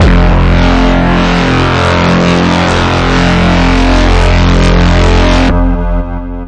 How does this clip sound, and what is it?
SemiQ leads 5.
This sound belongs to a mini pack sounds could be used for rave or nuerofunk genres
drone, intros, digital, machine, sound-design, ambiance, sci-fi, experimental, ambient, sound, soundscape, pad, soundeffect, application, fx, effect, nandoo, artificial